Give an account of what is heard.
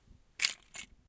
A person grabbing car keys.